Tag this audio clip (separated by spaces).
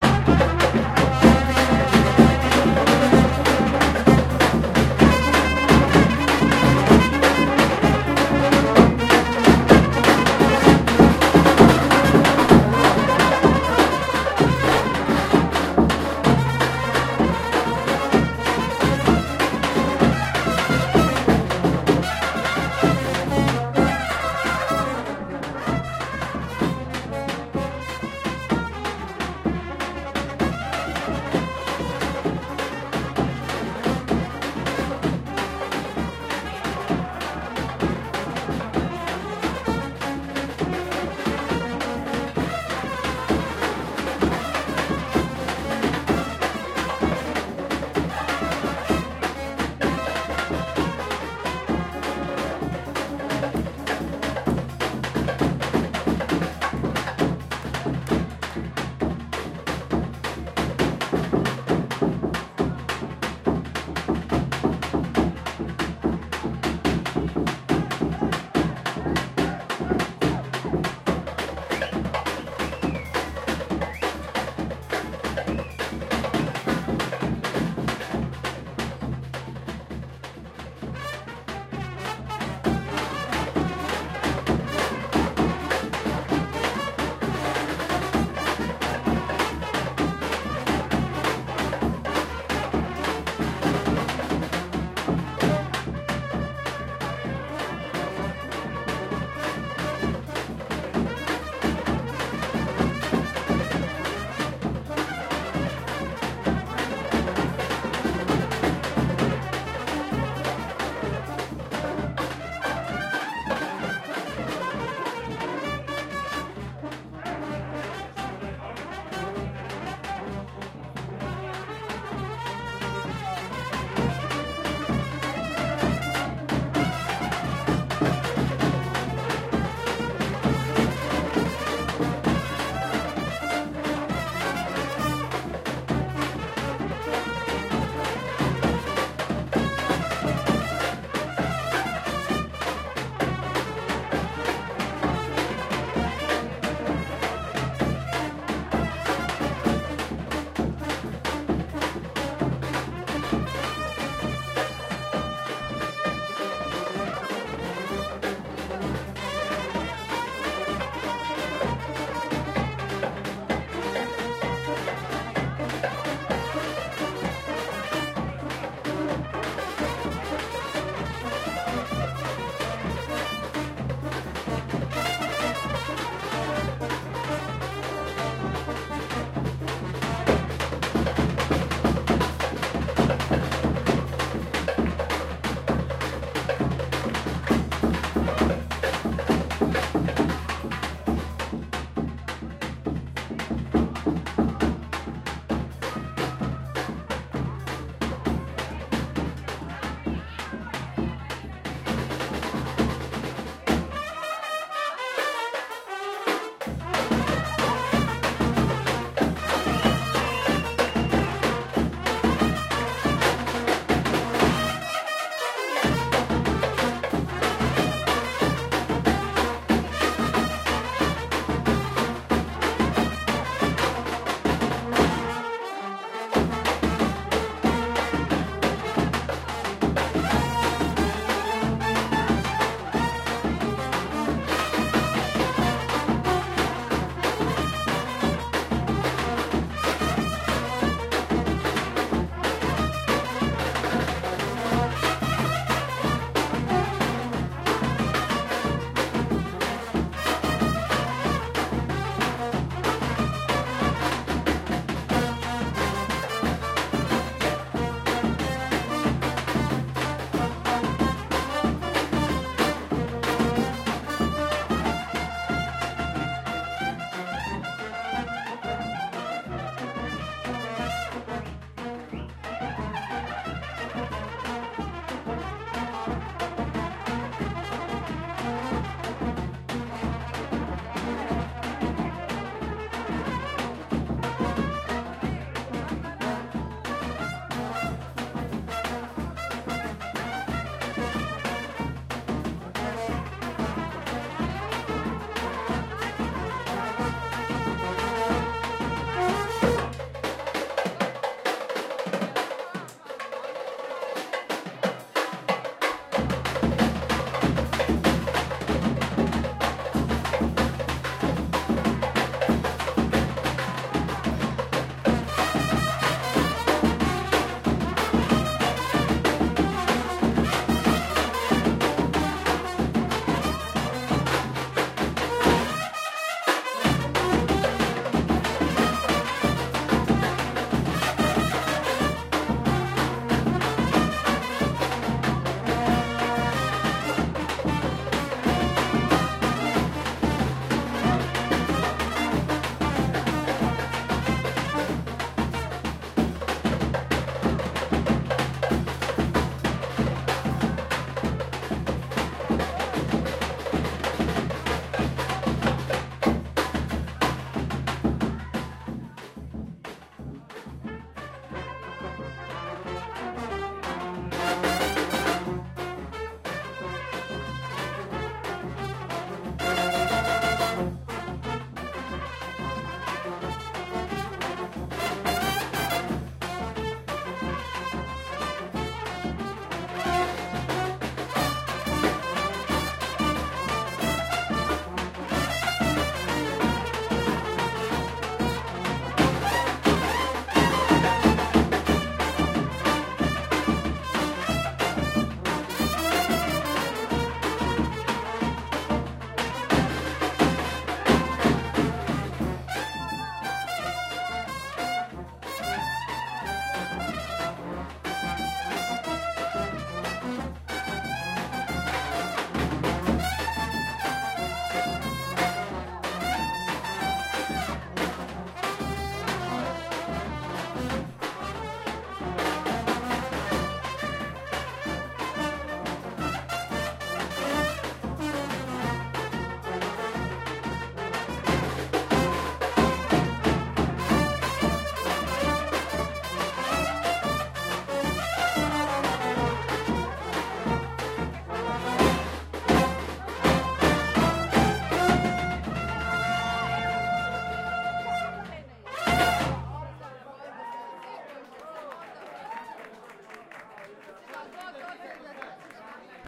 ambience atmosphere brass fragments improvisation noise tapas